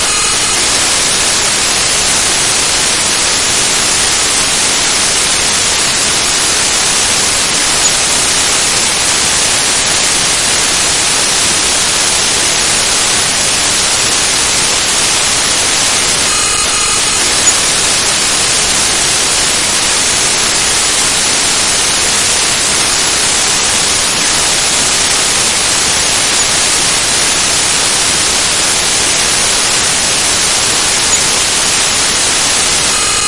PaAlErkdjah (A-TA KA)
Scanner, Daemon, PaAlErkdjah, A-TA, KA